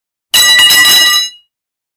Another metal bar clanging to a concrete surface. Recorded on my Walkman Mp3 Player/Recorder. Digitally enhanced.